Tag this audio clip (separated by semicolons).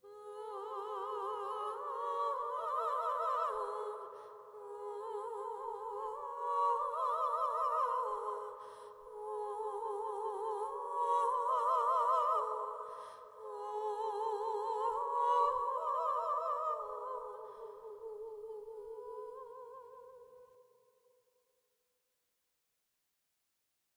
ethereal; female; female-vocal; vocal; voice; wind; woman